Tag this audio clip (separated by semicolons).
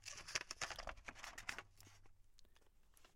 foley; page; paper; turn